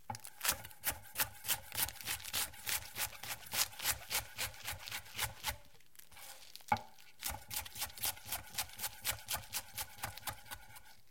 Cutting lettus
A simple recording of a lettuce being chopped up rather fast for those who do not have access to the sound but need it for a kitchen scene or project.
cooking, lettuce, sallad, chopping, vegetables, food, knife, kitchen